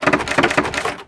Door locked jiggle